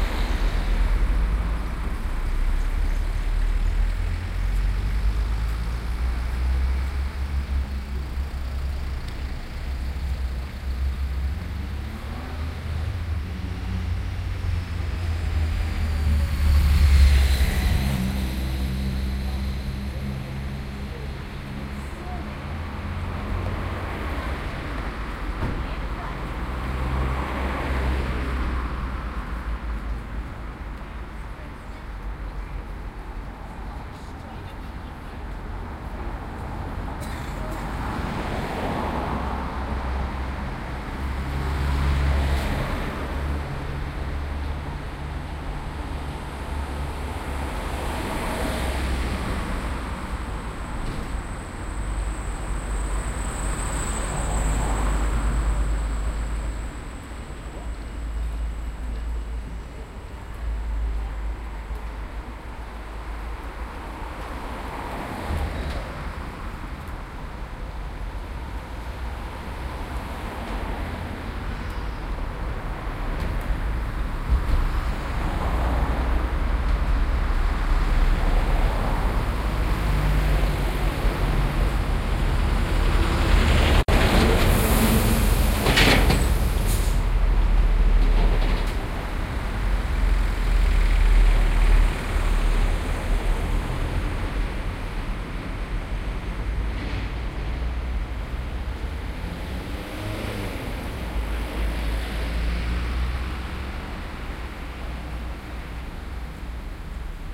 Here are a few minutes of traffic, recorded in Crieff, Scotland, standing in front of McNees, an independent deli and takeaway sandwich shop in the High Street. Shame, that one can´t record smells. Soundman OKM II and Sharp Minidisk MD-DR 470H.